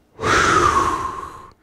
"Phew" - Relieved Male
My buddy says, "phew" in a windy wooshy type way, as he wipes the nervous sweat from his brow. He was on the Maury show and found out HE IS NOT THE FATHER.
whoosh, breath, woosh, voice, speech, whew, male, relieved, man, vocal, phew, human, relief, air, wind